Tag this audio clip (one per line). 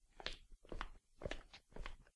floor
foley
footsteps
shoes
steps
tile
tiles
walk
walking